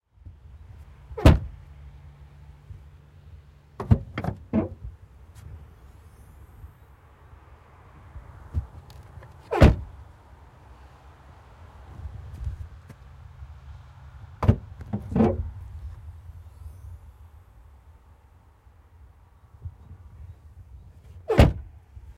08 Renault duster Trunk close open
Sound of Renault Duster trunk open close
car, close, duster, open, renault, trunk